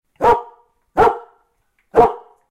Dog barks
barking, czech, dog, panska, pet, pets